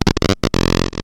modular love 09
A clicking bass sort of sound, but noisy and harsh made from a sample and hold circuit modulating at audio frequencies. Created with a Nord Modular synthesizer.